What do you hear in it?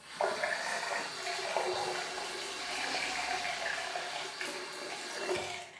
Some water from a tap recorded on DAT (Tascam DAP-1) with a Sennheiser ME66 by G de Courtivron.
water, tap